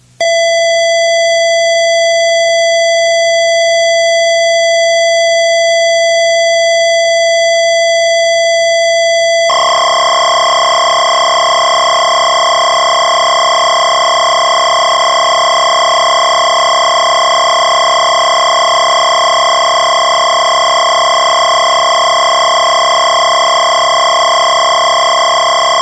This is actually a midi sync track, thought it was a data cassette or something.
midi, sync, track